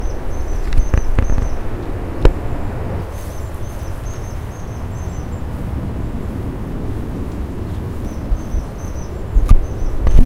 mallarenga emplomellada 1 ed
A crested tit delta of Llobregat. Recorded with a Zoom H1 recorder.
birdsong, crested-tit, Deltasona, el-prat